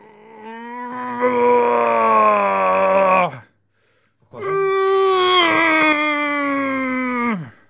Man Grunt2
Grunt, man, Scream, voice